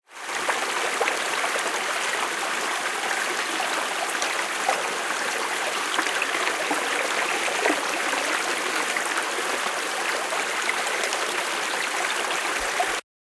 Streamlet/stream water
Recording of a streamlet in the countryside